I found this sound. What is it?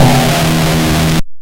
A clank/thud/drum sound.
clank, drum, machinery, mechanical, noise, robotic, thud